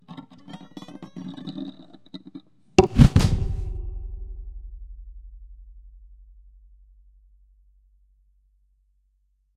CR BowAndArrow
Sequence - shooting arrow from a bow
dowel, pillow, rod, violin